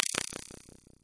tik delay 05
part of drumkit, based on sine & noise
noise, sine